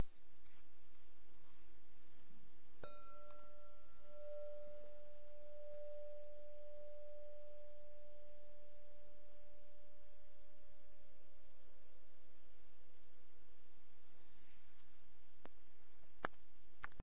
Striking glass bowl with the nail.
bowl, striking